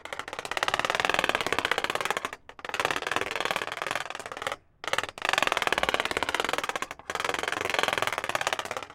Multiple metal clanging
clang, growl, iron, metal, metallic, rod, shiny, steel, ting